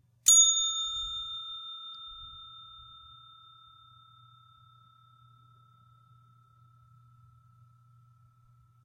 A single, sustained bell ring from a metal service bell. Recorded on a Marantz PMD660 with a Roland DR-20 dynamic microphone.